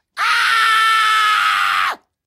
A sample horror-scream sound.
terror, scream, 666moviescreams, film, scary, horror